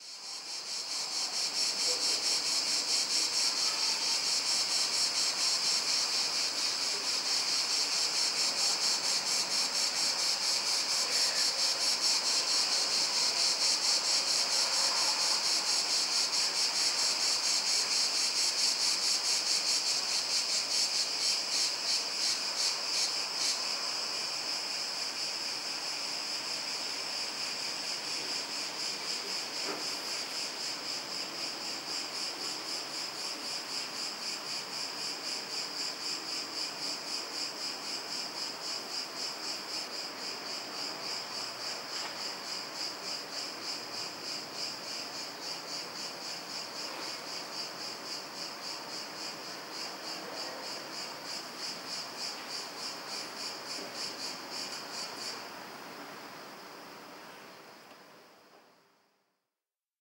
summer atmosphere full of cicadas. Recorded in mono with a Rode videomic.
mono, summer, cicada